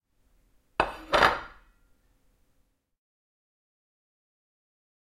laying down a plate on a wooden table